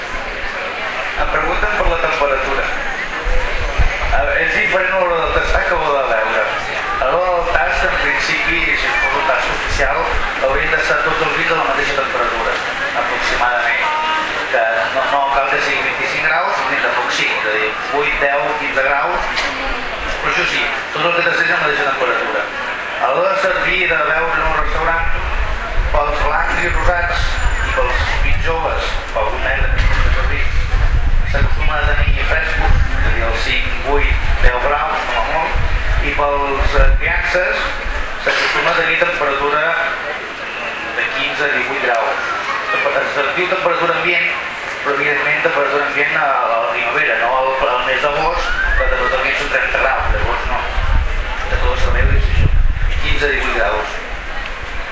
Fira del vi
This sound was recorded with an Olympus WS 550-M and it's the ambient sound of the Rambla one Sunday afternoon when there was a wine fair.
conversation, people, taste, wine